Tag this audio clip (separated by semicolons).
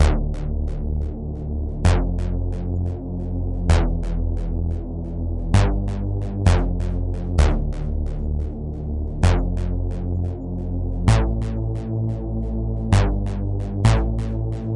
130-bpm; electro; bass; loop; synth; electronic